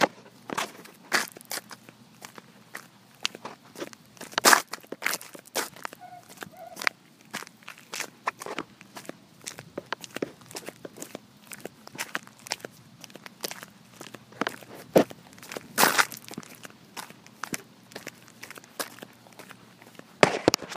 Walking on a gravel road. Enjoy!
walk, steps, walking, footsteps, stones, footstep, running, grind, pebbles, crunch, foot, gravel, step